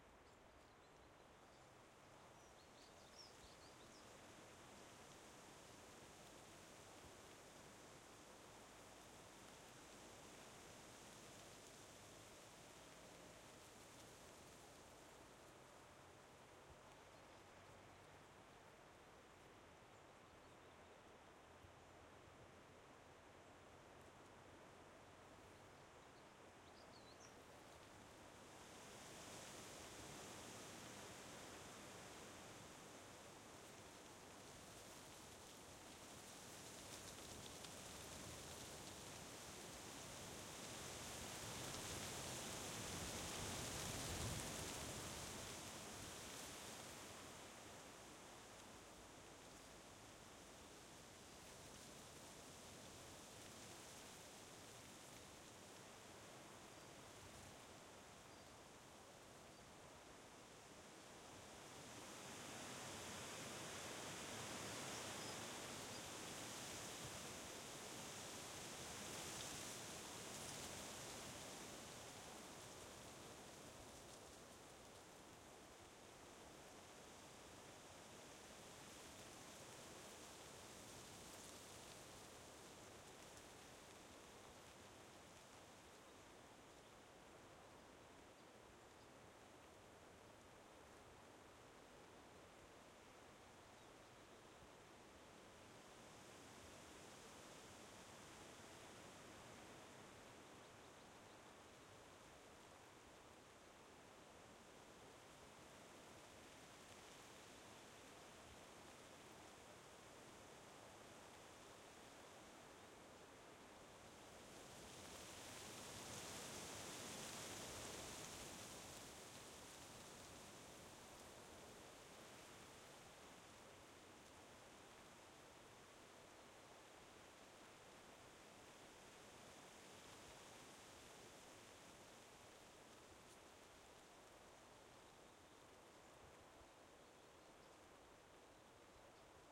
Forest, trees rustling in the wind
'close up' sound of a tree's branches and leaves rustling in gusts of wind, with the constant roar of distant swaying tree tops.
Recorded on a stereo Audio Technica BP4025 into a Zoom F8 Mixer
branches, wind, trees, leaves, wood, rustling, ambience, forest, atmosphere, atmos, woodland, swaying